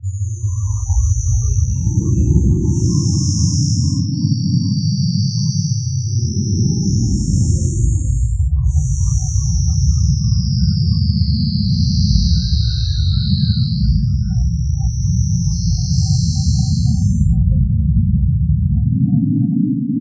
Eye of the massive storm on Jupiter sound created with coagula using original bitmap image.